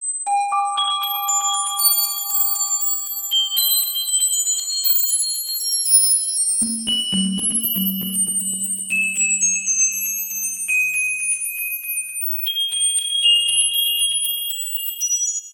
I found in this VSTi, if you change the program while a note is still playing it triggers a lot of interesting glitches.
arpeggio, glitch, error